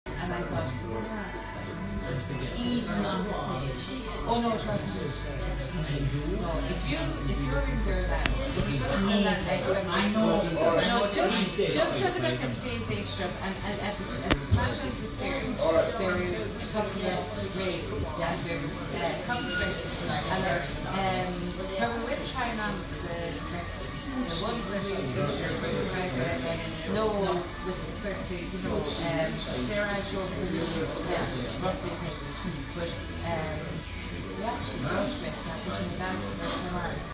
chatter in Irish pub
chatter, ireland, people, pub, women